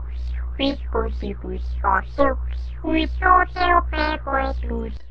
random sound of beeps and boops that I made
boop, beep